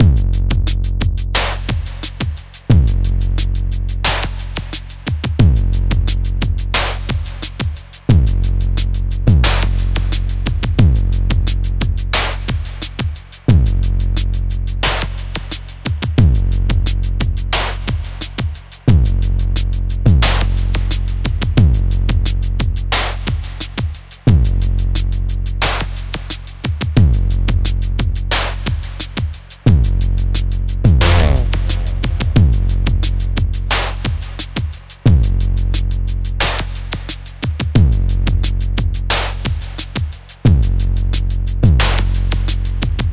0001 demence drum loop
demence drum loop.
BumBer Deluxe kick and ableton live sounds.
dance, drum-loop, drum, hip-hop, dnb, BumBer, percs, rhythm, drumbox, music, ableton, live, superkick, loop, beat, electronic, quantized, sound, Deluxe